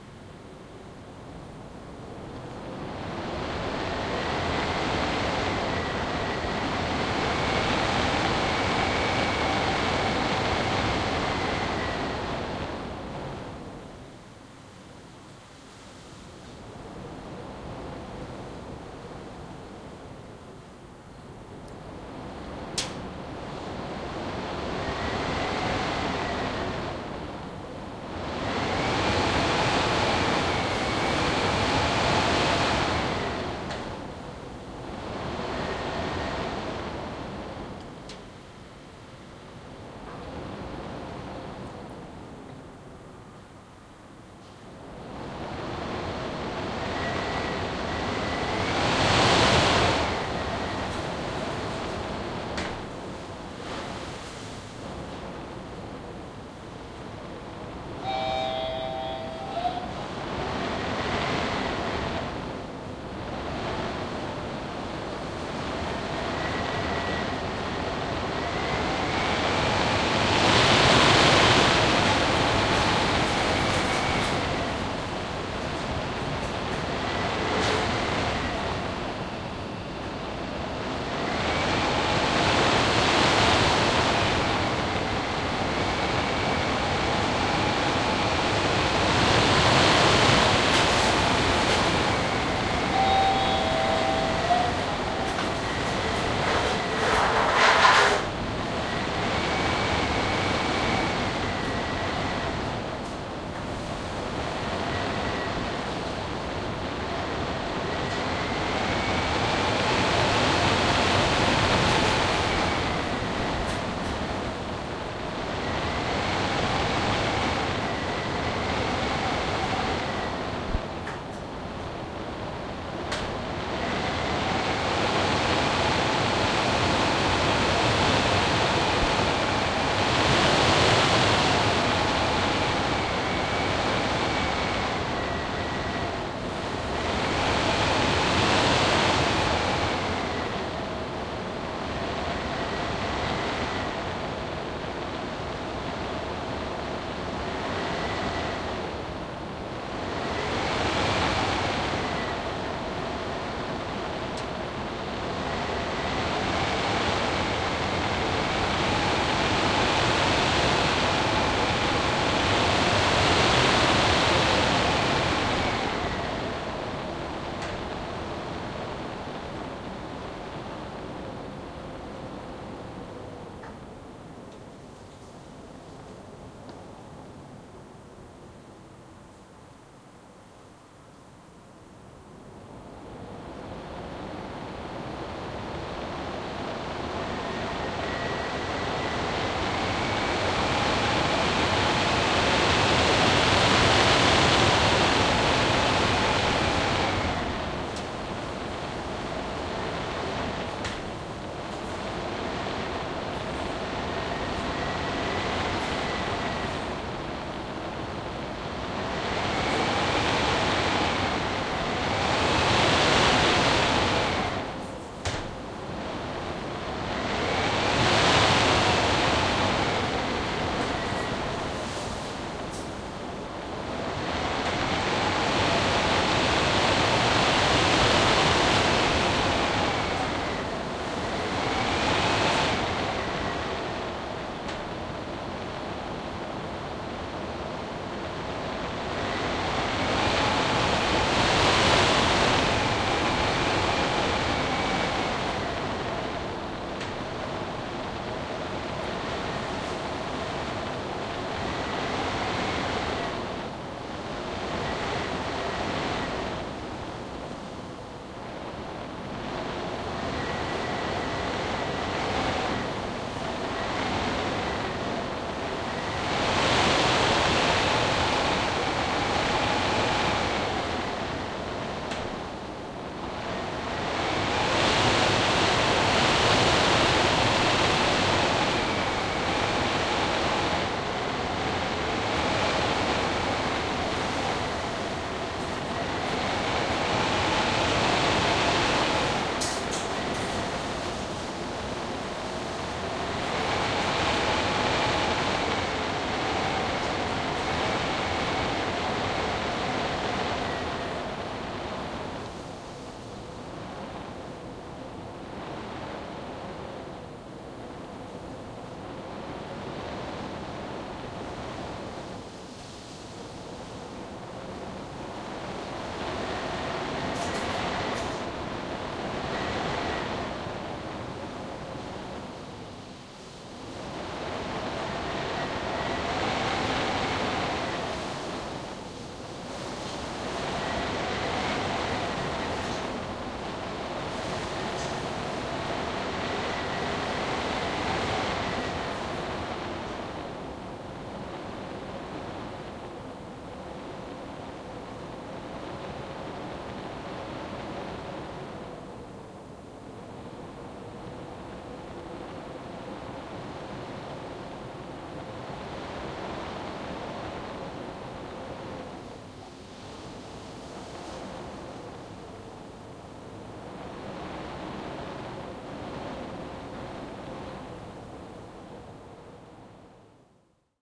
Storm Caught in Lift Shaft
This is a field recording of a storm howling inside the lift shaft of a five storey building.